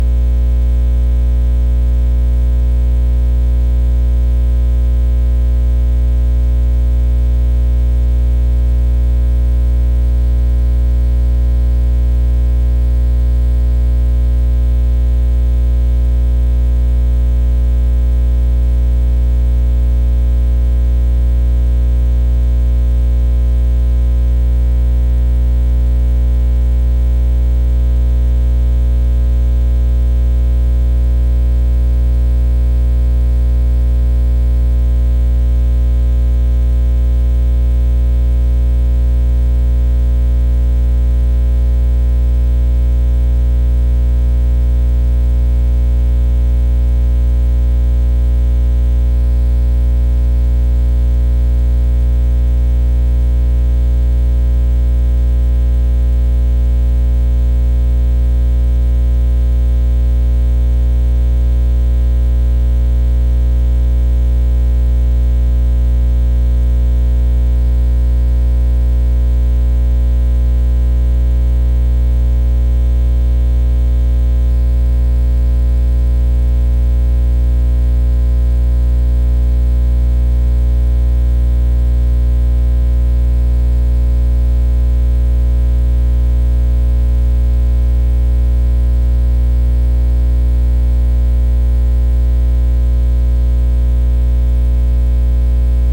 ground hum
hum, drone, ground, buzz